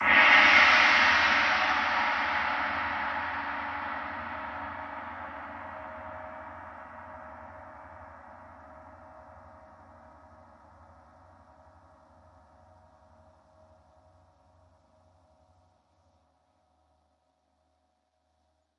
Orchestral Concert TamTam Gong 11
Multi velocity recording of a full-size 28" orchestral symphonic concert Tam-Tam gong. Struck with a medium soft felt mallet and captured in stereo via overhead microphones. Played in 15 variations between pianissimo and fortissimo. Enjoy! Feedback encouraged and welcome.